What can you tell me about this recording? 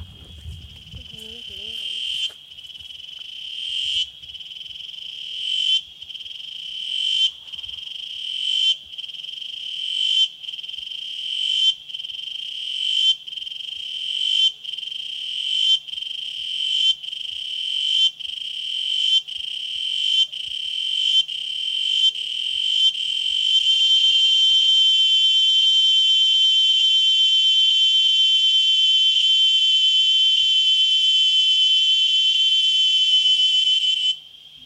Chicharras from Chimalapas mountain
Recorded with Canon xl1s built in mic pointing straight up to the canopy in the Chimalapas Mountain of San Antonio Chimalapas, Oaxaca, Mexico. March 2012.